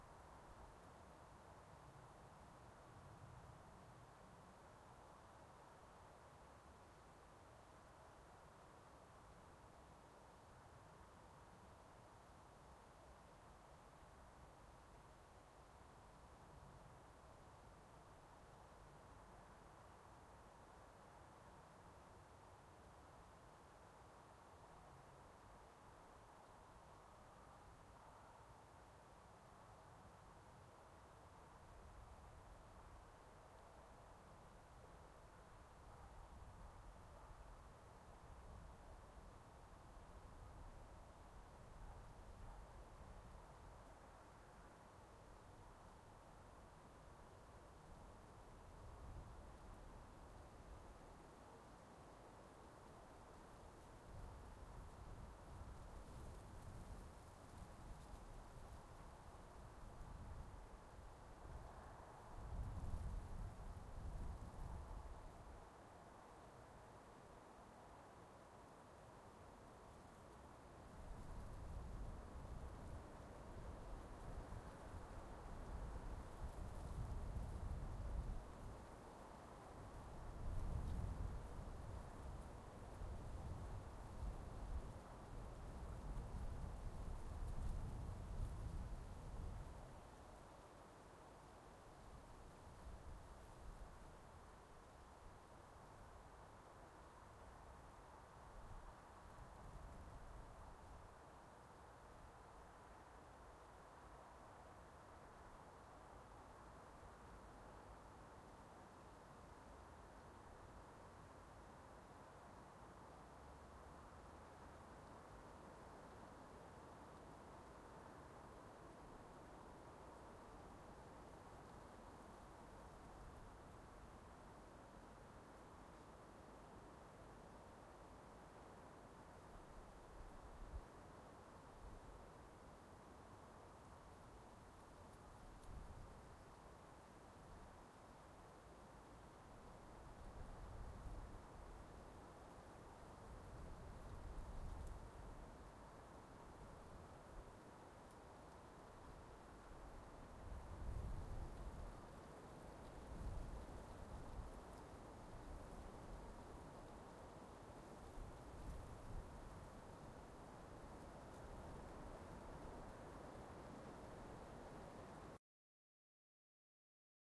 Forest 7(traffic, cars, wind)
forest, nature, ambient